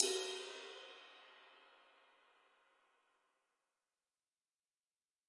A custom-made ride cymbal created by master cymbal smith Mike Skiba. This one measures 20.5 inches. Recorded with stereo PZM mics. The bow and wash samples are meant to be layered together to create different velocity strikes.